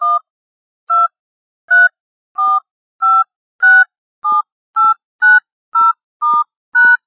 Handy Numbers
Record of my cellphone pressing buttons 1-#.Used my headset mic to record this.background noise was removed with Audacity.the several beeps can be cut out and combined as needed.
beep, buttons, cellphone, handy, phone, pressbutton, telephone